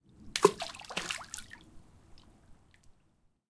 Splash, Small, A
Audio of a small splash by throwing a plastic grenade (toy!) filled with water into a swimming pool. Some minor EQ cleaning to reduce the ambient noise. About 1 meter from the recorder.
An example of how you might credit is by putting this in the description/credits:
The sound was recorded using a "H1 Zoom recorder" on 16th August 2017.
pebble, rock, small, splash, splashing